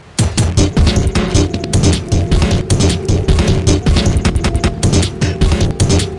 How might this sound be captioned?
House Crime 2
these are some new drumloops i have to get rid of.
beats, dnb, lockers, stuff